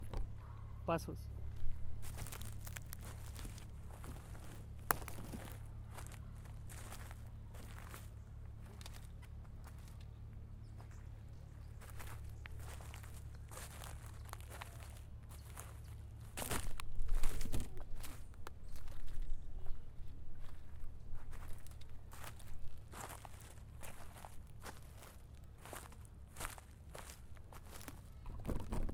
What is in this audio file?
Steps on grass 2
Gradas de asceso a una resbaladera
resbaladera, step, jump, grass